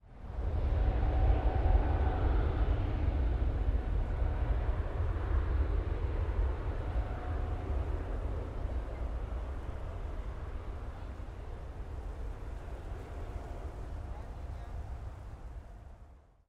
Takeoff 4 (Distant)
A commercial jet plane taking off (all of these takeoffs were recorded at a distance, so they sound far away. I was at the landing end of the runway).
Aircraft, Distant, Exterior, Field-Recording, Flight, Jet, LAX, Plane, Takeoff